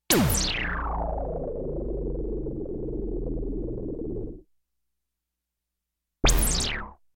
decelerate discharge
sound created for science fiction film. created with a minimoog.
blaster, fiction, gun, ray, sci-fi, science, technology